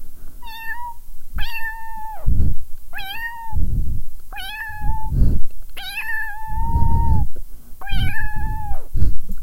Cats meow
This one is easy to do but hard to explain... In order to make this sound I pucker up my lips so that my upper teeth are against my lower lip. The I breath in softly which makes that cute noise (that's also why you hear breathing against the mic after.) Hope you all like ;)
kitty, feline, meowing, cats, meow, kitten, cat